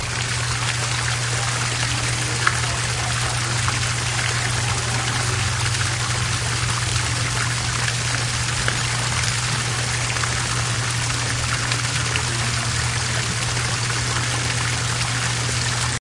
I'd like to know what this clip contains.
zoo morewater
Walking through the Miami Metro Zoo with Olympus DS-40 and Sony ECMDS70P. Even more water sounds.
animals, field-recording, water, zoo